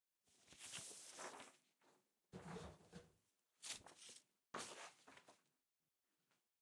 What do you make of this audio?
folding open a folded paper on a table